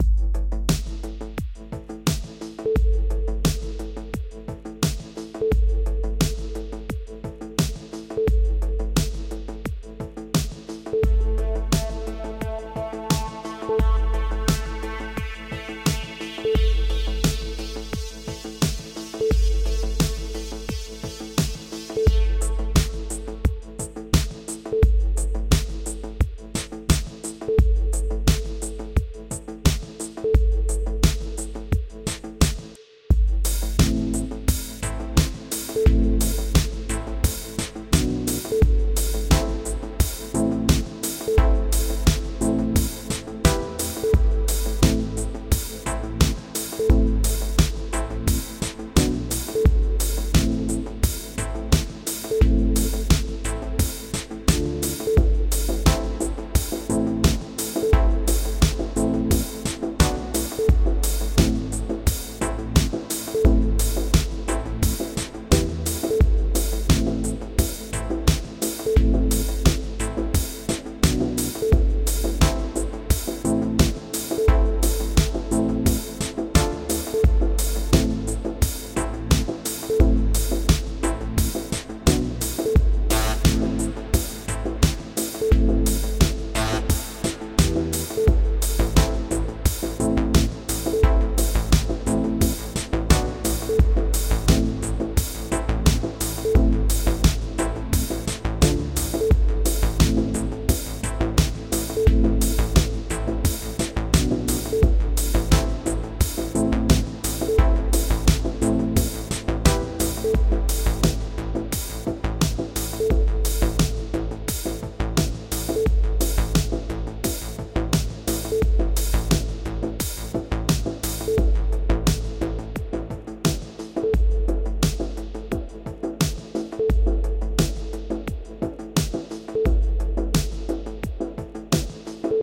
Electronic music track X1.